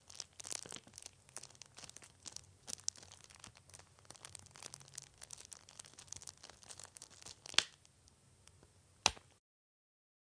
grandes llamas que se apoderan de una casa